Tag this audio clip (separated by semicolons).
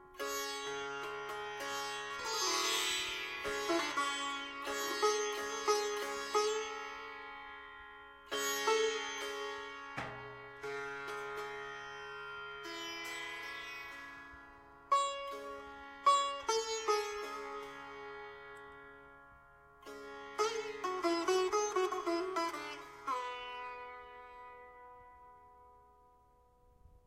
acoustic; Indian; music; raga; sitar